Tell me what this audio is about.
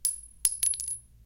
Shell 9mm luger 06
A large pack with a nice variety of bullet shells landing on the ground. (Just for you action film people :D)
I would like to note, however, something went wrong acoustically when recording the big .30-06 shells dropping to the ground (I think my recorder was too close when they hit) and so they have some weird tones going on in there. Aside from that, the endings of those files are relatively usable. If anyone can explain to me what went on technically, I would appreciate that as well.
All shells were dropped onto clean concrete in a closed environment, as to maintain the best possible quality level. (I had film work in mind when creating these.)